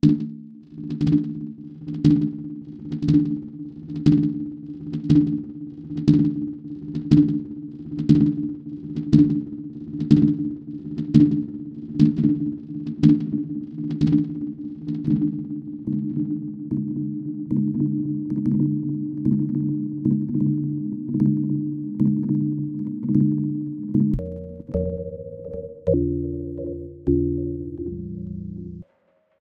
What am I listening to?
dub perc 005
percussive effectsounds for ambiences
percussion, sounddesign, reaktor, drums, experimental, dub